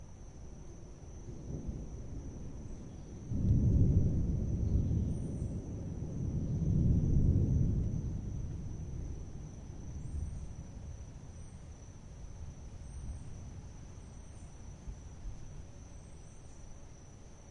Low Rumble 02
Equipment: Tascam DR-03 & diy wind muff
A low thunder rumble recently recorded during a thunder storm.